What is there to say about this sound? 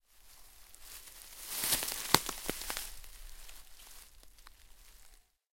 Walking on leaves 2
The sound of me walking on leaves in the forest. It's a pleasant feeling, even though the leaves had fallen too early due to the heat this summer.
trees, leaf, Nature, forest, leaves, ambience, ambient, field-recording, tree